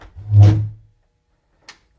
Door Open 4
Wooden Door Open Opening
door, open, opening, wooden